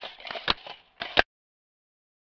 An umbrella opening and closing sound slowed down.